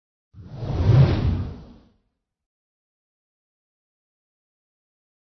long wispy woosh4
01.24.17: Long slowed-down woosh for motion design with a lessened low-end.
attack, high, light, long, motion, move, moving, swing, swish, swoosh, whip, whoosh, wispy, woosh